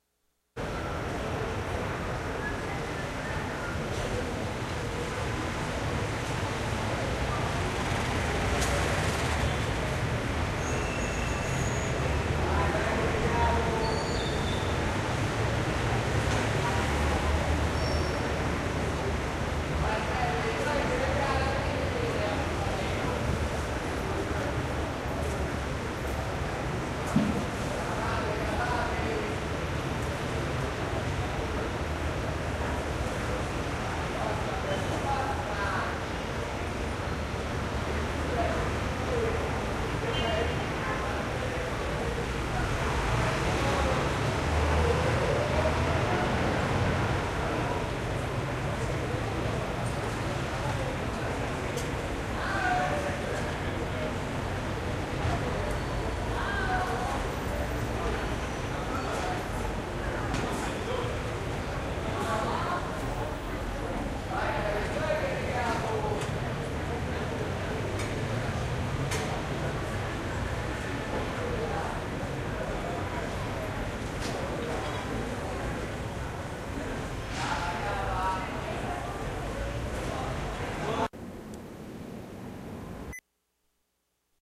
Ambience recorded on a street in Rome Italy
ambient, atmosphere, field-recording, italy, space, street